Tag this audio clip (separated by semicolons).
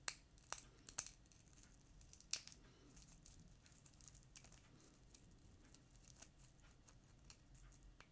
crackle; eggs; crack; biologic; organic